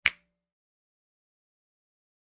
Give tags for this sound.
sample finger-snaps snaps real-snap simple percussion snap finger snap-samples

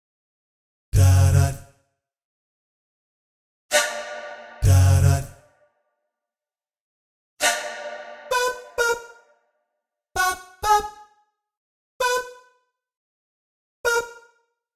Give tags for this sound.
trip dance sample bass instrumental loop hop down experimental glitch tempo drum chill beat looppack electro electronic Hip

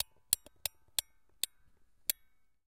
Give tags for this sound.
box click music music-box tick wind-up

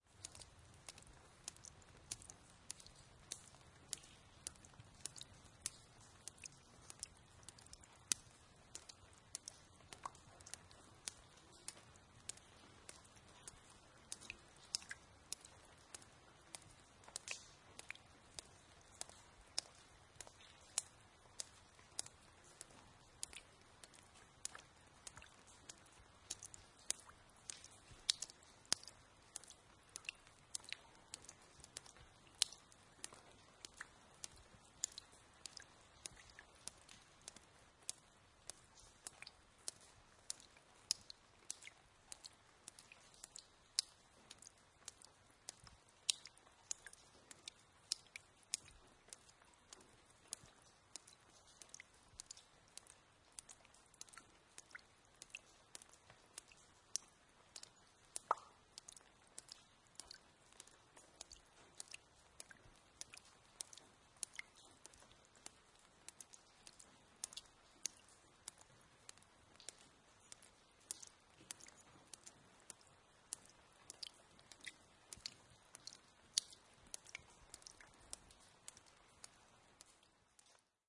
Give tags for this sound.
ambiance drips france gers ground miradoux night quiet rain sidewalk stone village

